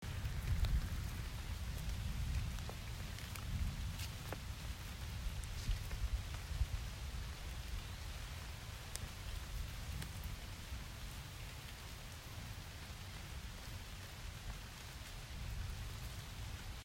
Rainfall from inside a house
rain, rainfall, raining